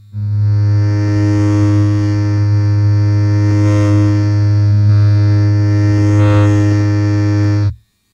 ELECArc-int moving ASD lib-zoom-piezzo-stephan
buzz, distorted, electro, riser, techno